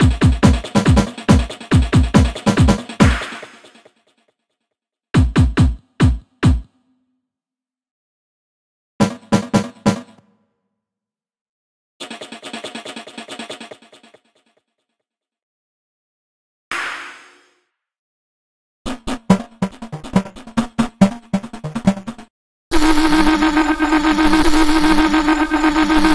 Drum Loop 140 BPM
I was bored and made this loop on FL Studio (jumpscare warning at the end).
Stems in order: kick, snare, shaker, transition, unlayered loop and the original sound that i made the unlayered loop from (some motor sound that i recorded).
For anyone that might wanna recreate the unlayered loop, i made it using EQ, some Valhalla Delay preset called Magenta, Gross Beat plugin (Chaos 4/Drum Loop), a plugin called "Pancz" by Oversampled (Add Punch & Flavour preset), Gross Beat again (Complex 10/Drum Loop) and little bit of bitcrush using FX(Effector FX Module).
Original motor sound that i used for making this